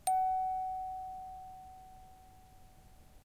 one-shot music box tone, recorded by ZOOM H2, separated and normalized